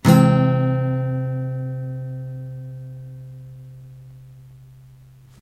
yamaha Caug ugly
Yamaha acoustic guitar strummed with metal pick into B1.
acoustic, amaha, augmented, chord, guitar